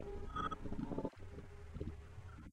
lowercasse sounds quiet minimalism
lowercase, minimalism, quiet